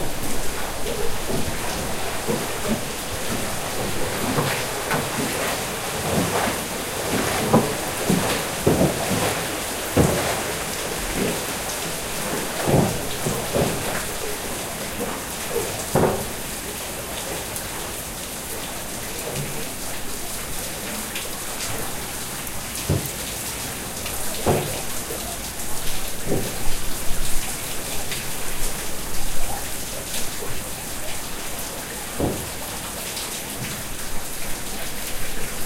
Watermill-Prague
Grand Priory Watermill (Velkoprevorský mlýn) in Prague. Recorded at midnight in the rain.
Watermill, Prague, water